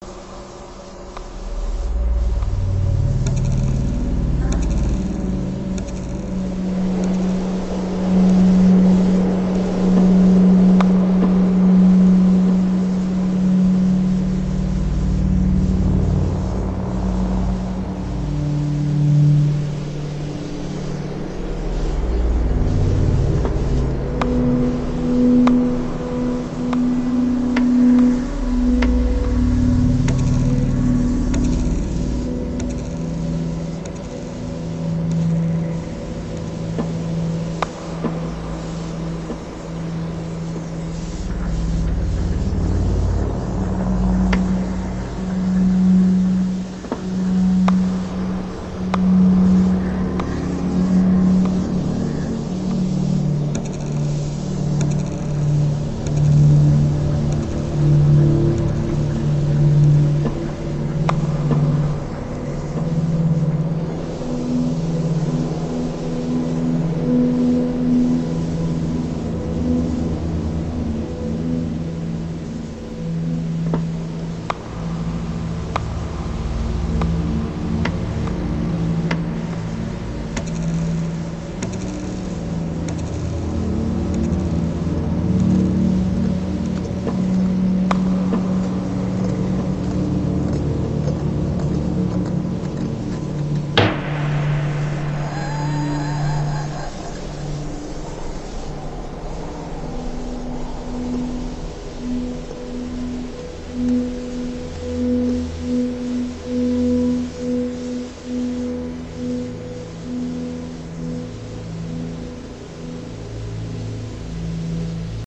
Spectre Ambient Soundscape
nightmare, spectre, phantom, Dreamscape, bogey, ghost, suspense, terrifying, anxious, horror, scary, Ambient, haunted, halloween, drama, spooky, thrill, fearful, terror, sinister, fear, dramatic, creepy